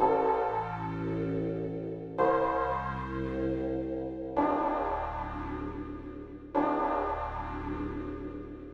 bassline synth 110bpm-08
bassline synth 110bpm
110bpm, bass, bassline, beat, club, dance, electro, electronic, hard, house, loop, progression, rave, synth, techno, trance